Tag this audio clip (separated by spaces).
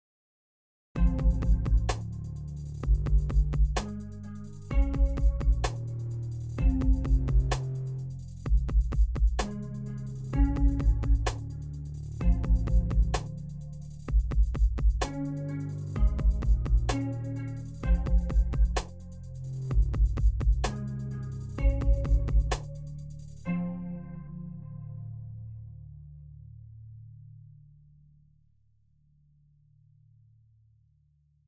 128-bpm electronic grey industrial loop sad morning music rhythmic